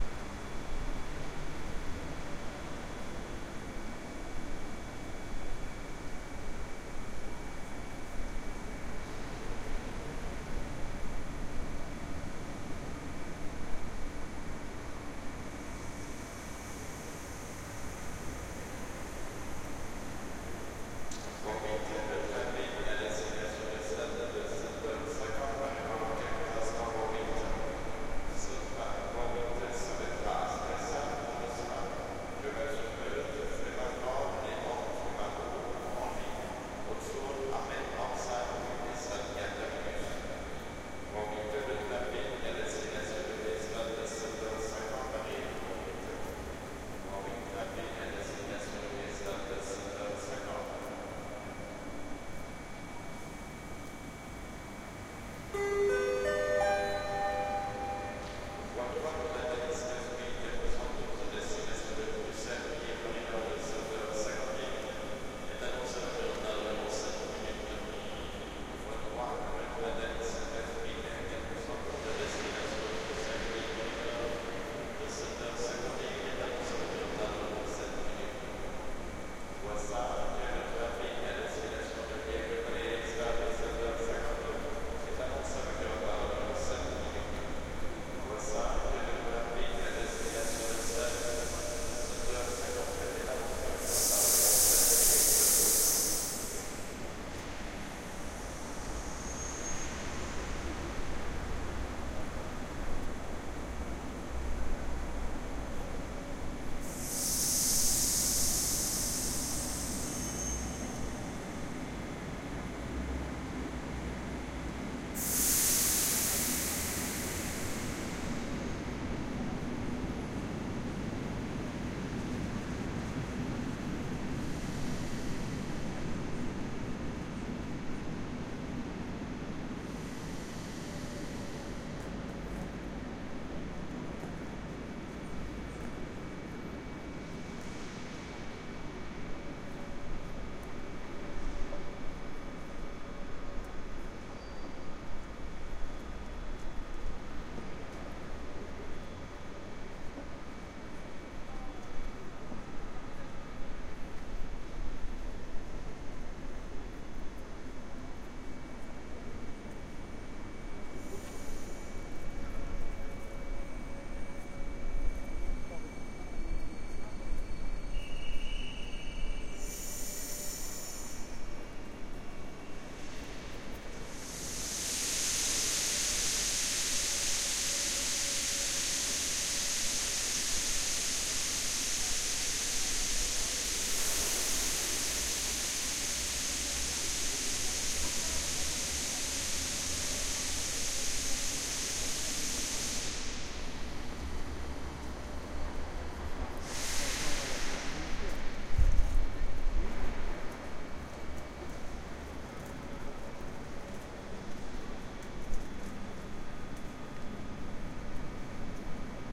Recorded with an iPhone 4 with Tascam stereo condenser mike, waiting for my train to Paris at the Liège Guillemins train station. We hear the size of the space at first then a passenger announcement and eventually trains letting off compressed air, trains arriving and departing and more announcements. Finally, some passengers passing by can be heard speaking.
announcement, arrival, Big, departure, hall, information, passenger, reverb, Station, train